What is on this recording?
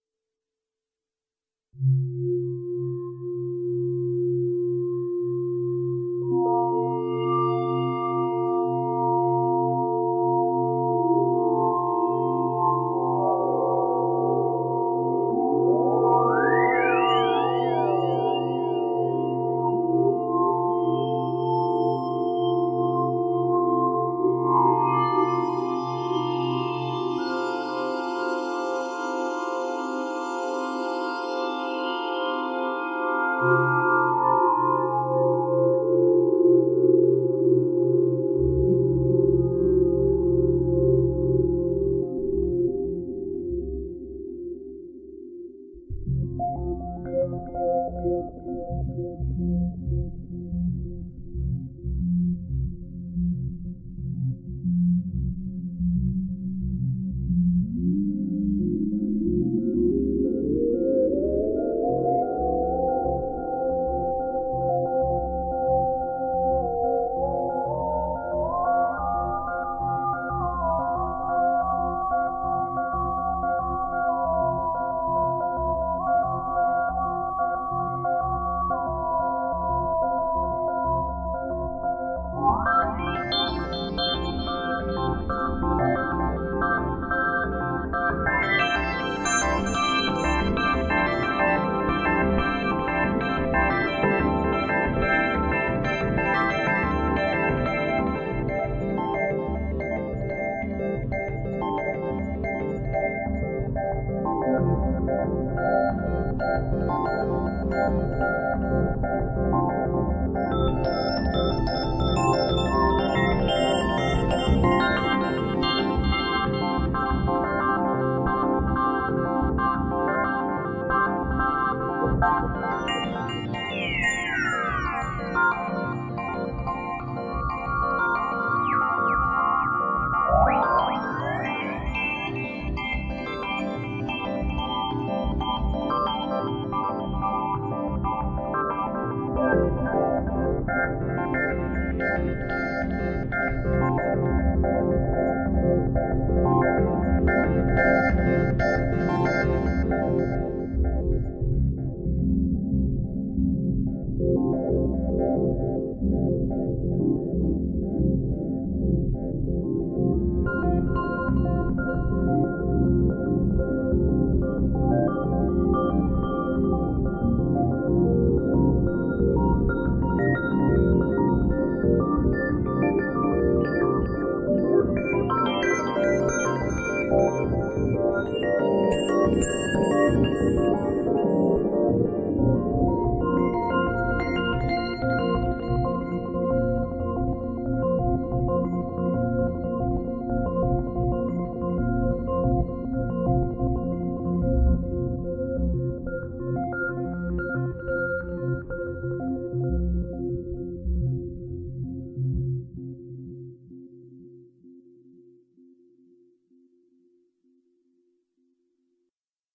Made with Arturia Origin.